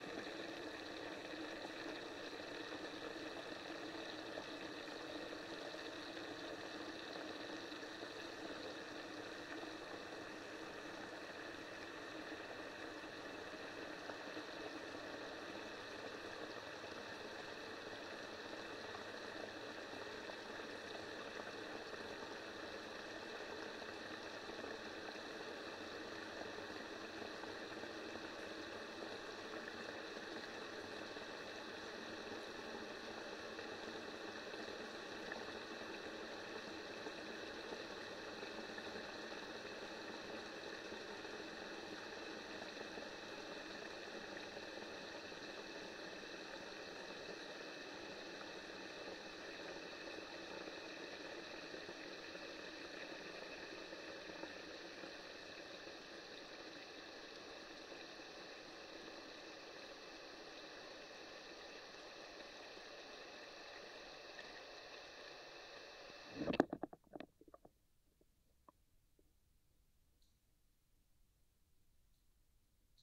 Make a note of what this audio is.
Recording of water flow from my flush pipe. Mic: Korg CM300, Recorder: H6.
ambience, korg, sfx